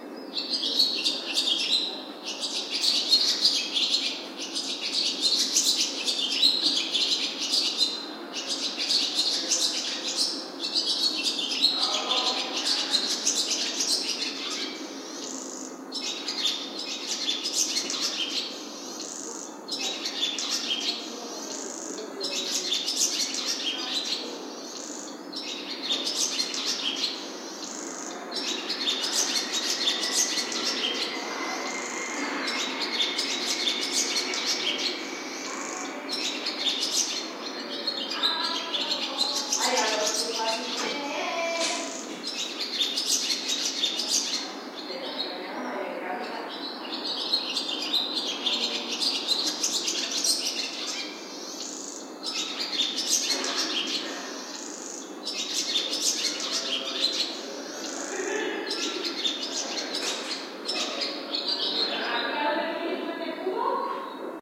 20060312.swallow.patio
patio ambiance with swallow singing in foreground and voices in background / ambiente de un patio con una golondrina cantando y voces detras
birds, city, south-spain, nature, field-recording